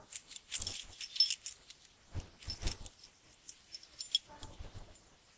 Key noises
Keys noises/shaking. Recorded in audacity in mono (My First Upload!!!)
home, keys